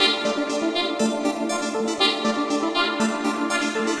120 Num Synplant DAFGE
A melodic synth loop made with Numerology using Synplant and some FX
120-bpm, Synplant, delay, electronic, loop, melodic, modulated, processed, rhytmic